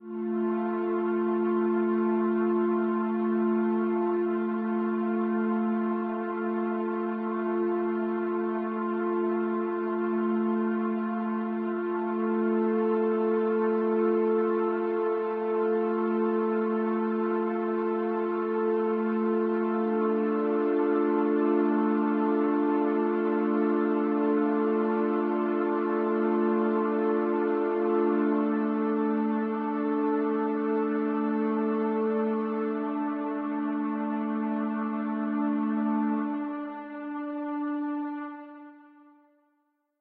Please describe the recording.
stronger than the dark itself
Ambient pad for a musical soundscape for a production of Antigone
ambient, drone, musical, pad, soundscape